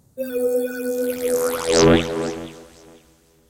HITS & DRONES 09
broadcasting, Fx, Sound